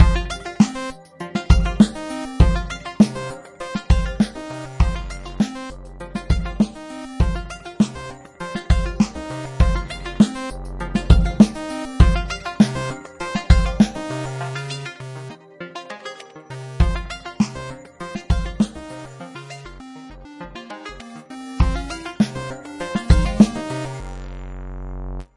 My some little synth experiment, like 8-bit game era music. Created in FruityLoops Studio. Enjoy!